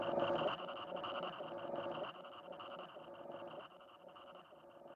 delayed bed of shuffling static with pulses of throaty mid-lo bass purr
quiet fade out with echo
equipment used: